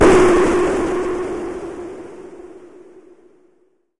Tonic Noise Explosion
This is a noise burst sample. It was created using the electronic VST instrument Micro Tonic from Sonic Charge. Ideal for constructing electronic drumloops...
drum, electronic